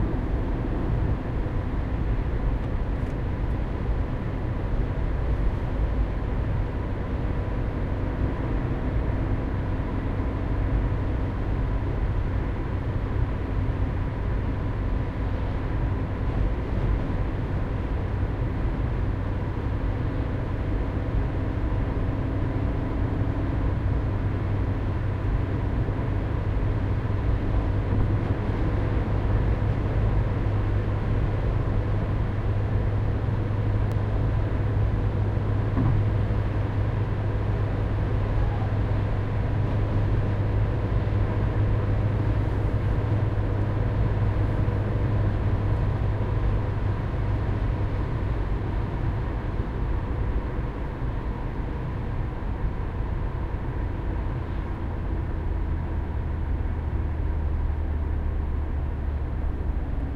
Driving in a car
Inside a car while driving
auto, automobile, car, drive, driving, engine, motor, vehicle